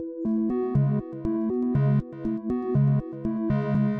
a5sus2 arpeggio stab glassy synth clicky low freq atmosphere-13
a5sus2 arpeggio stab glassy synth clicky low freq atmosphere
techno, music, house, club, freq, low, bass, a5sus2, dance, glassy, atmosphere, trance, loop, arpeggio, clicky, synth, stab, ambient, electronic, electro, rave